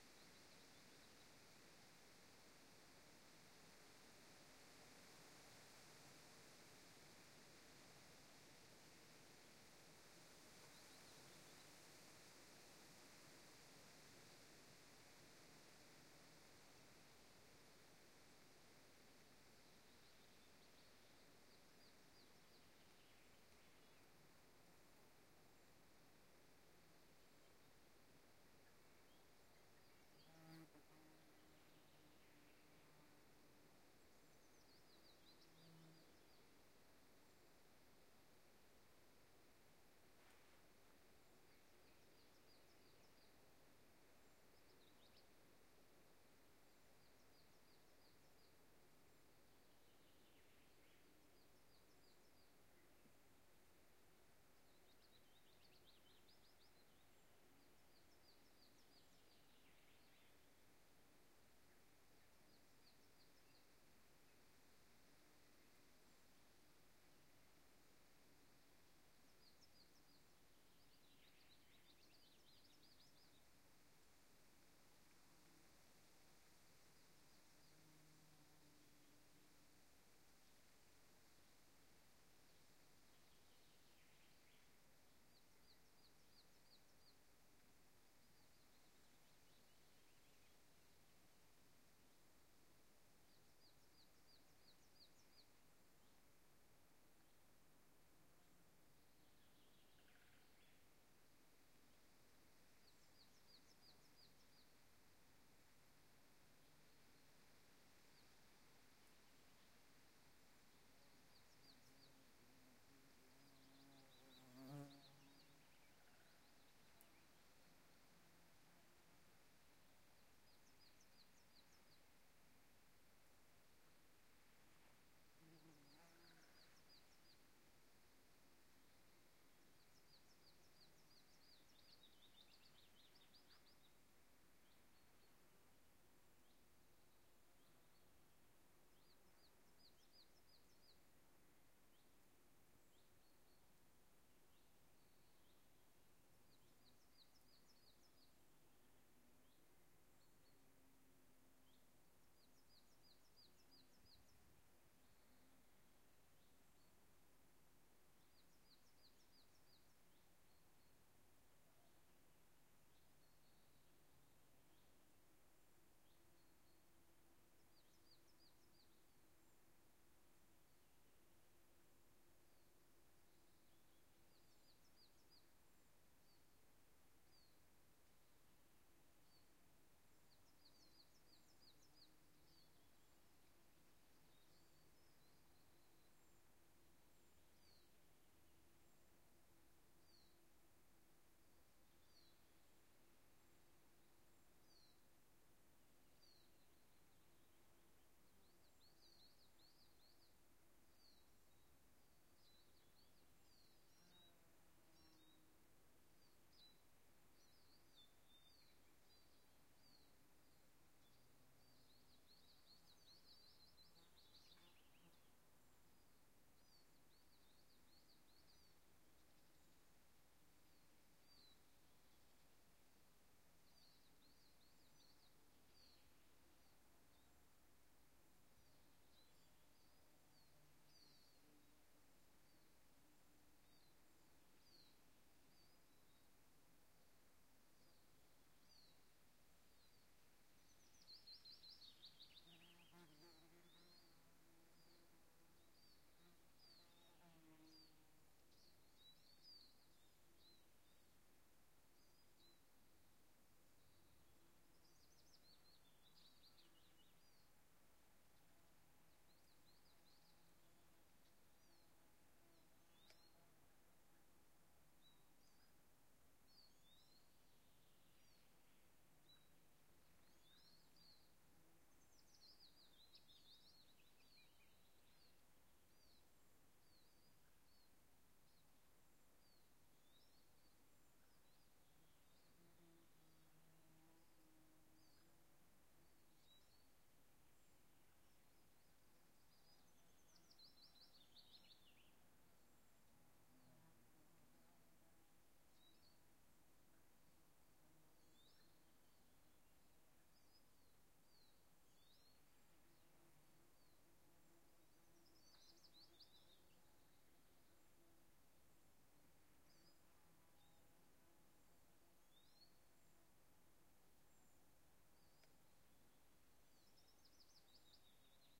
Nord Odal Nyhus 04 juni 2011 quiet forest birds insects leaf rustle 01
nice and quiet forest, with birds and insects. Deep in the woods in Nord Odal north of Oslo.